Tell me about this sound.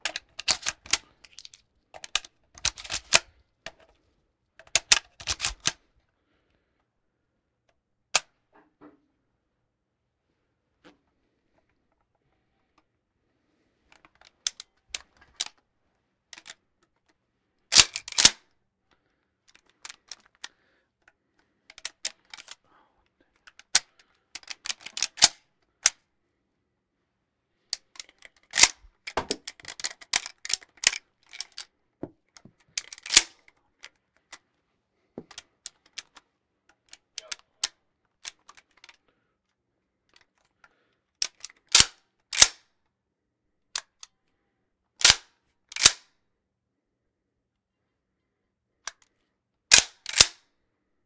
Winchester rifle loading and cocking
Loading and cocking a 22 cal Winchester rifle multiple times